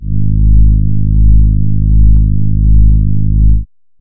Warm Horn Gs1
An analog synth horn with a warm, friendly feel to it. This is the note G sharp in the 1st octave. (Created with AudioSauna.)
horn, synth, warm, brass